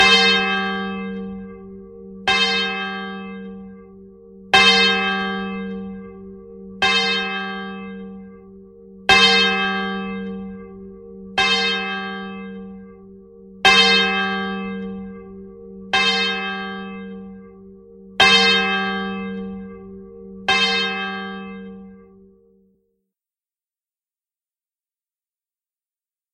This is a swinging English tuned bell. Another good call bell. Hope you enjoy.
Bells, Church